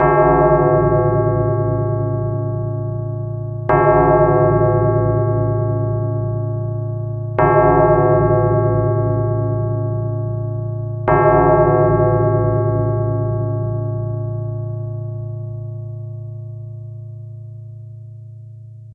made with vst instruments
metallic, clang, ding, ting, clock, church, ringing, hit, church-bell